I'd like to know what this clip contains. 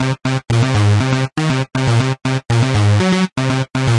synth lead loop